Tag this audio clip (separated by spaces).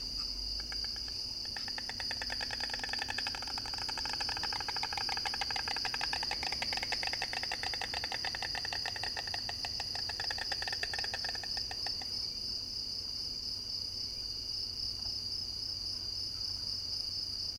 ambiance birds clapping dinosaur field-recording insects jurassic-park nature night summer